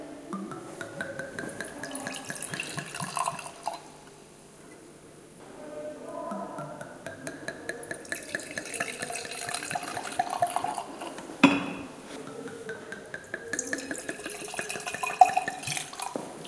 Pouring wine
A simple recording taken straight out of a GH4 camera, of three different wine pours into a glass.
water, bottle, wine-pouring, wine, pour, pouring, water-pouring, wine-spilling, water-spilling, spilling, spill